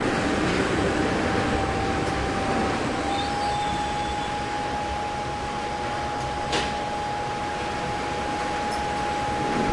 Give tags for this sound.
factroy
field
wroclaw

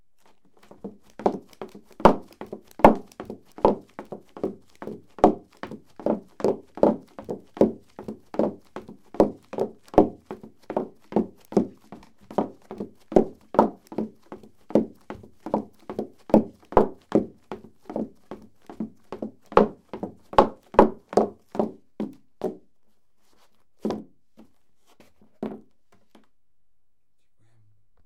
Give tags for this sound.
run
running
steps
step
footstep
footsteps

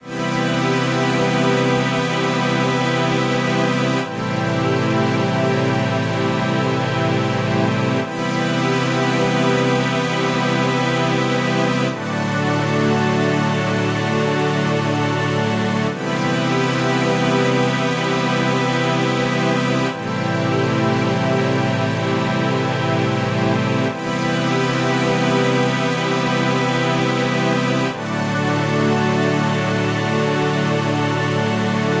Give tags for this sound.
Melodic
Film
Strings
Loop
Cinematic
Pads

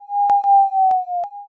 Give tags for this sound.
video-game
8-bit
chiptune
retro
lo-fi
arcade
chip

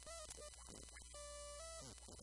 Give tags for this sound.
broken-toy
circuit-bending
digital
micro
music
noise
speak-and-spell

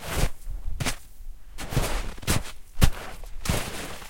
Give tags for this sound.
snow
hit
beating

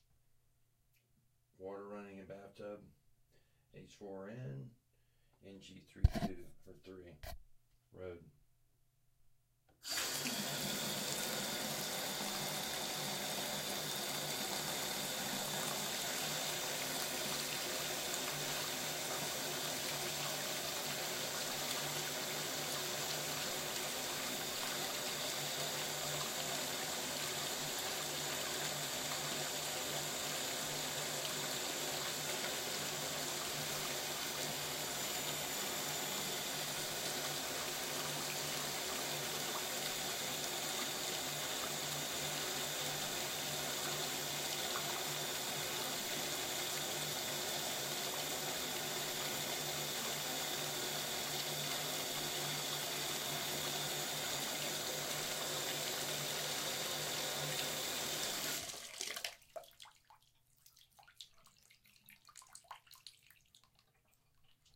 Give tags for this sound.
faucet
running
shower
tub
water

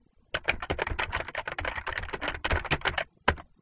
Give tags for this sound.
hacker
press
hack
hacking
keyboard
keys
type

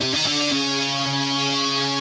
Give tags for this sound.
bit
blazin
crushed
distort
gritar
guitar
synth
variety

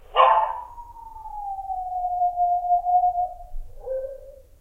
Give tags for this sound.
dog
howl
howling
wolf

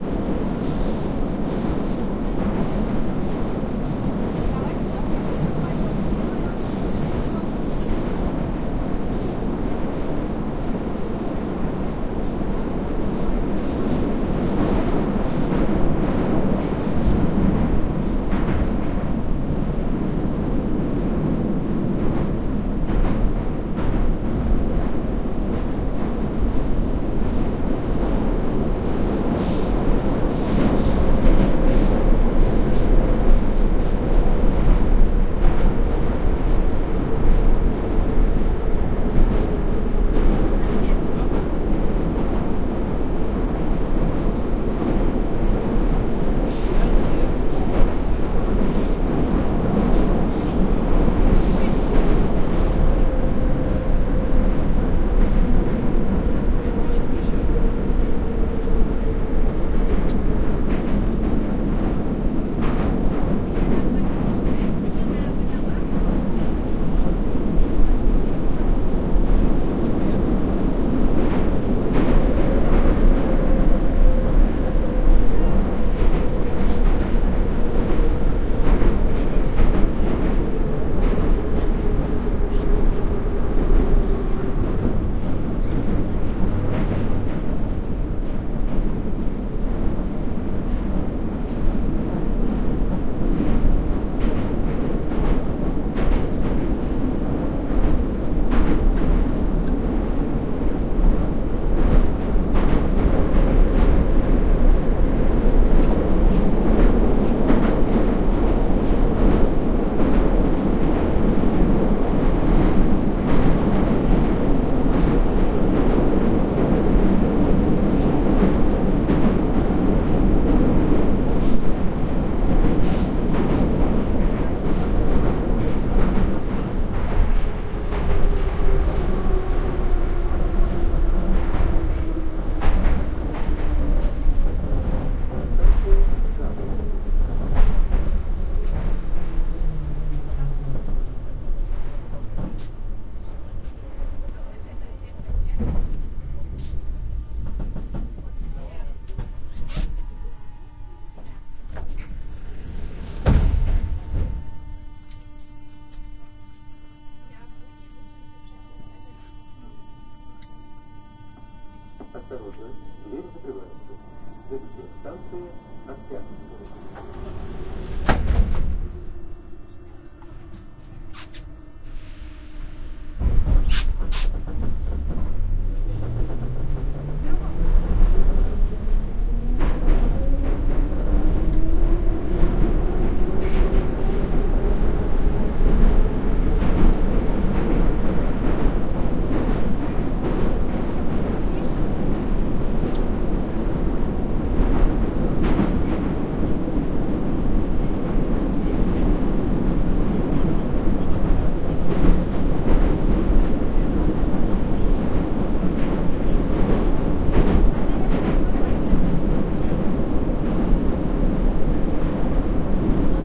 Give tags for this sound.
station
wagon

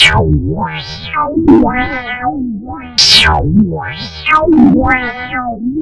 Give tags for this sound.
open-space
dub
electric
effects
pad
mellow

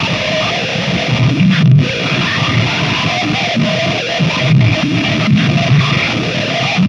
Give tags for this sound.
Electronic
Machines
Alien
Noise

